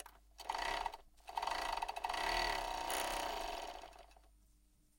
Queneau machine à coudre 29
son de machine à coudre
machinery, POWER, coudre, industrial